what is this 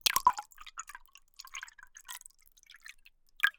Water gently being poured into a mug
aqua, bottle, filling, fluid, Liquid, pour, pouring, splash, stream, trickle, water